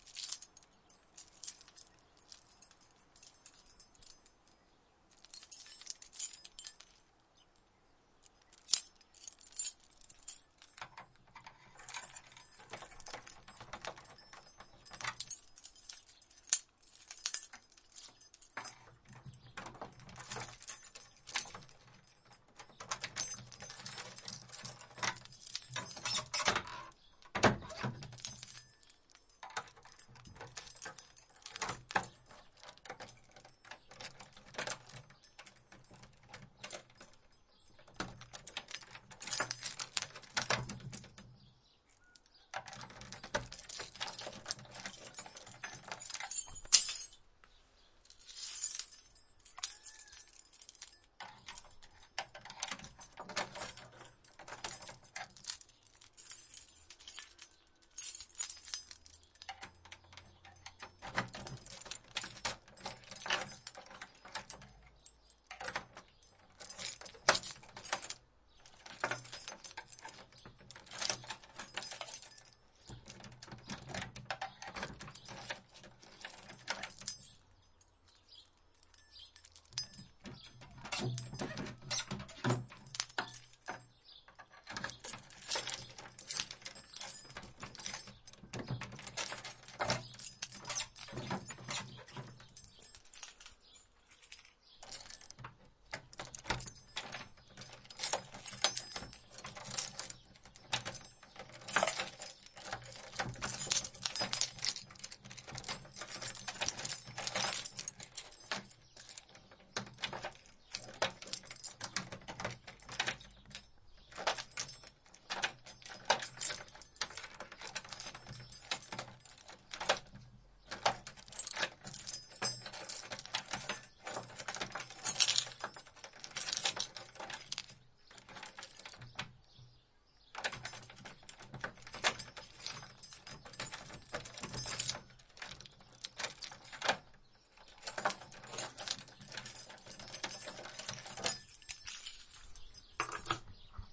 keys handful in antique lock unlock with handle in wood shutter door jangly unlock various on offmic